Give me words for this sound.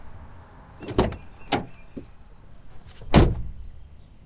Field recording of a car door opening and closing.